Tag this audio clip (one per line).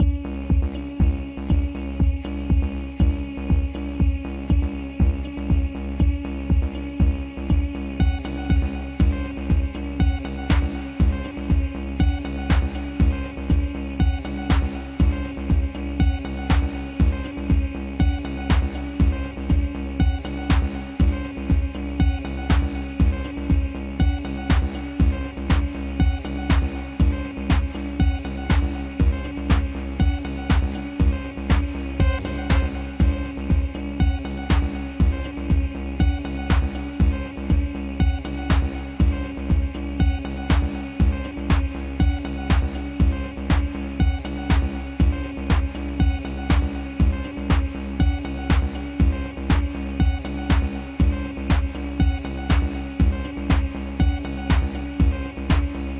acid
house
loop
bounce
rave
club
techno
original
electro
minimal
trance
Electronic
voice
bass
kick